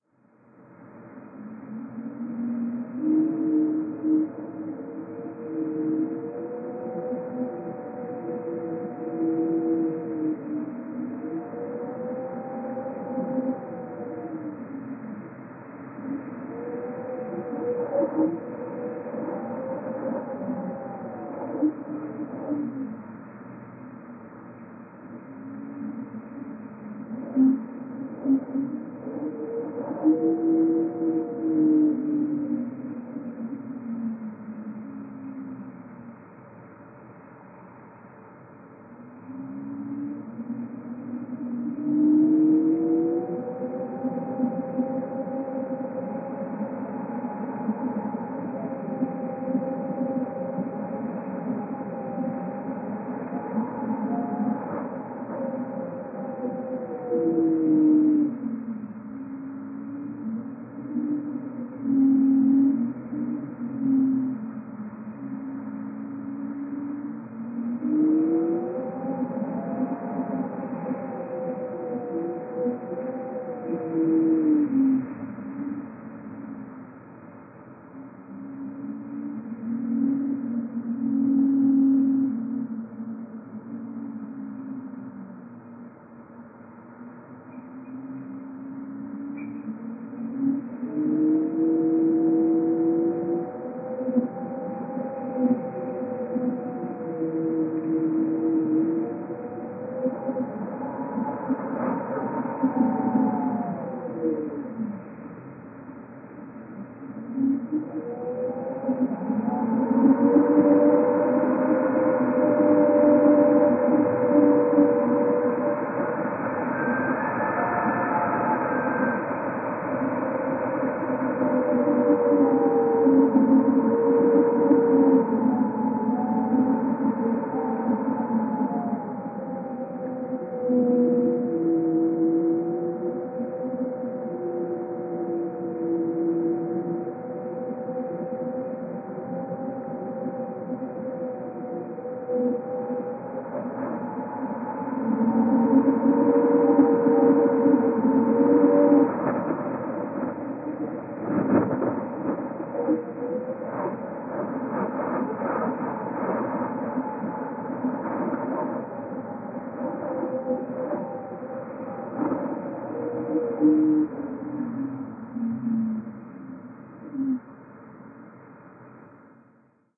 storm 2 atmo
storm sound thru mailbox
weather, atmosphere, storm